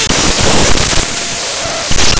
Raw - Data Hit 3
chip, click, data, glitch, hard, noise, pcm, raw